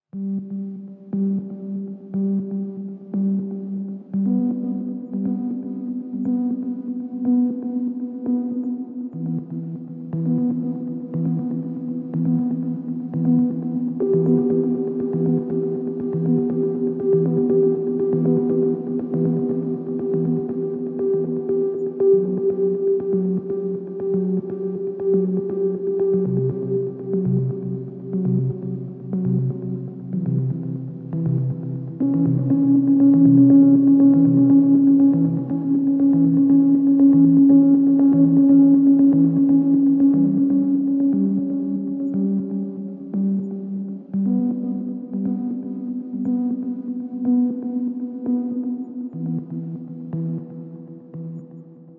Night drive - synth mood atmo
electronic, atmosphere, dark, movie, music, synth, drive, mood, ambient, ambience, Night, dramatic, soundscape, suspense, cinematic, background, drone, drama, film, atmo